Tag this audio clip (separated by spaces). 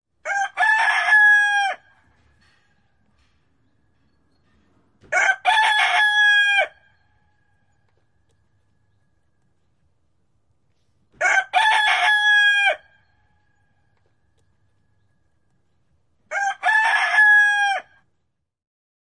Yle Yleisradio Soundfx Field-Recording Kukko Suomi Finland Tehosteet Domestic-Animals Finnish-Broadcasting-Company